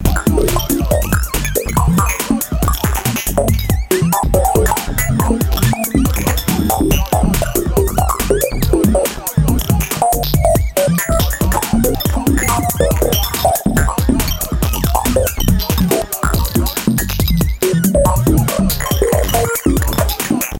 Affective Disorder frickle tickle 6
squelchy very bleepy
loop. it has sine tones that go op and down through various cutters and
torturing tools. You can dance on it when in the right mood.